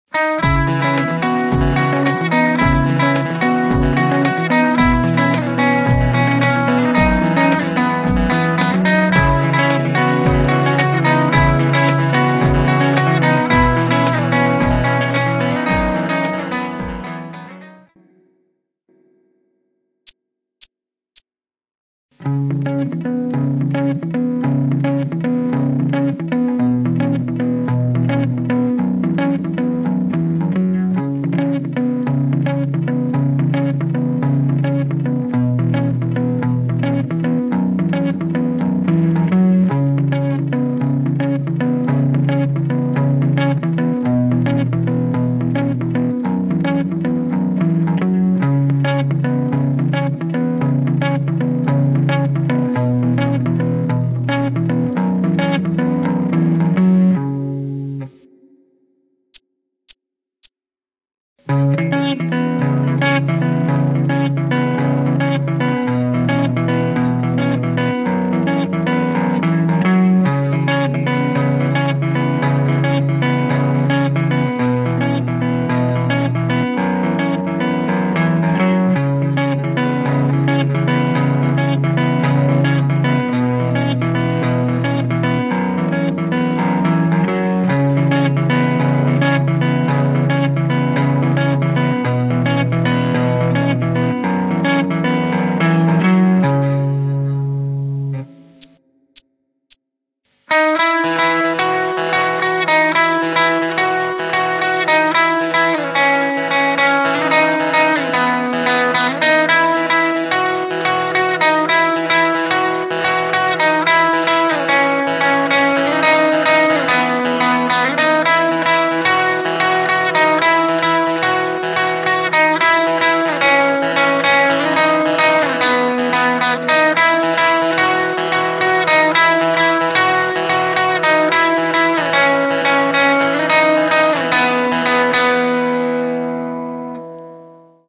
Lespaul Alt F#@110bpm
This file is a sample set for a track which you hear in the very beginning. After come its elements: muted rhythm part (LP neck pickup), full sound rhythm part (LP neck pickup) and melodic pattern (LP bridge pickup).
LesPaul, loop, riff, sample